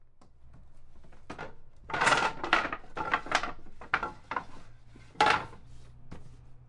Int-movingwoodboards
A friend moved wooden slats around. The tone sounds as though the boards are either dropping or being thrown.
boards, dropping, Folly, throwing, wooden